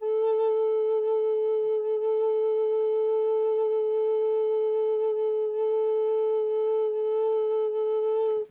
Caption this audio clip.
Alto Recorder A4
Quick sampling of a plastic alto recorder with vibrato. Enjoy!
Recorded with 2x Rhode NT-1A's in a dry space up close.
flauto-dolce, flute, plastic-recorder, recorder, woodwind